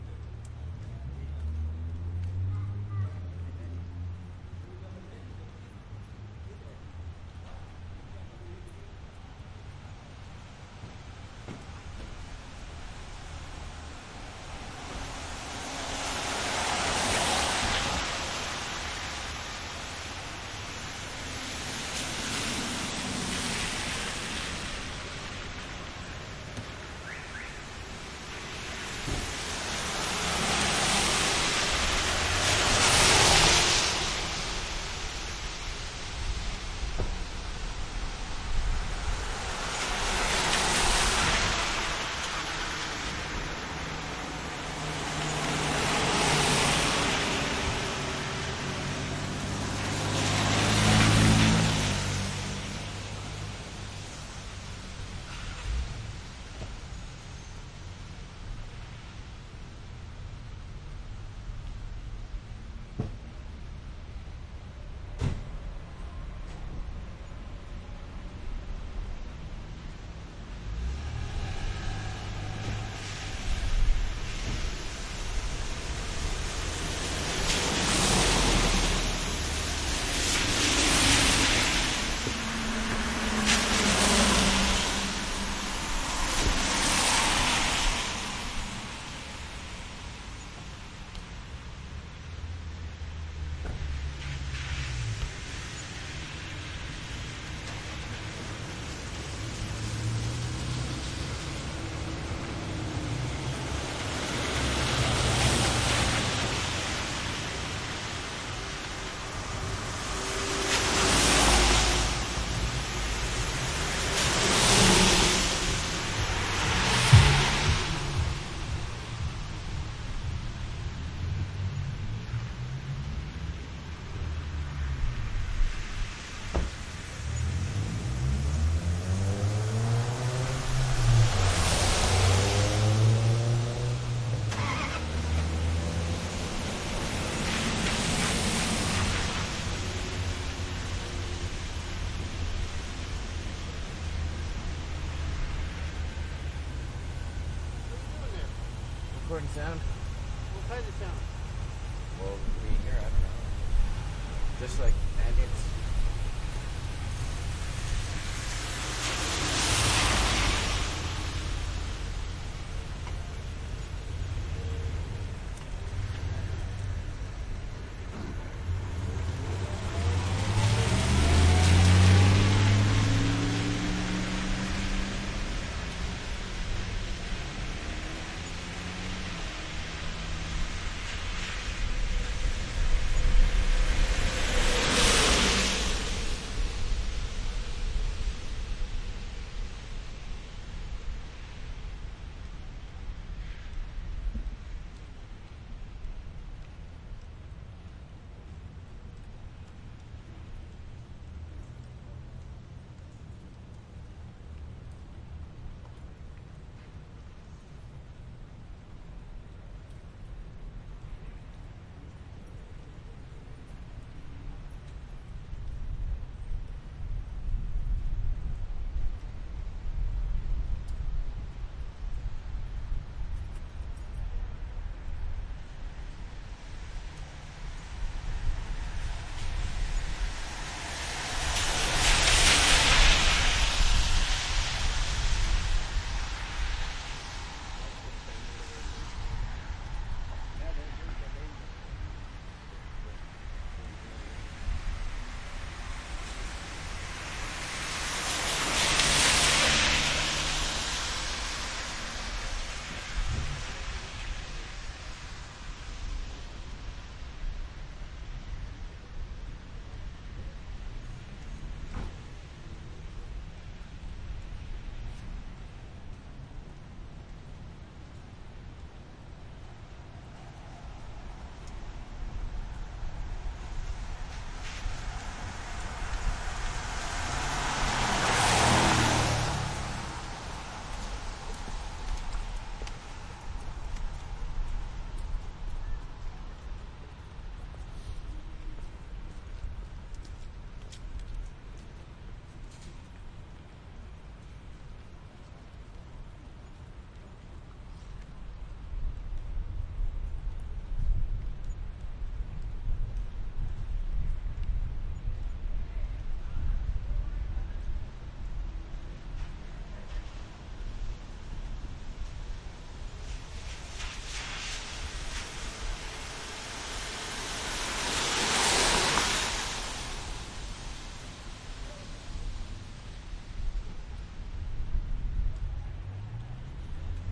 traffic light wet cars pass good detail medium and fast speed Montreal, Canada
traffic, medium, fast, good, detail, pass, speed